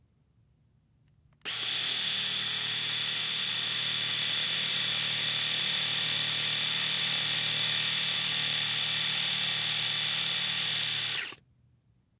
machine sound 2 (electric razors) 02
A recording of an electric razor my friend and I made for an audio post project
electric-razor, machine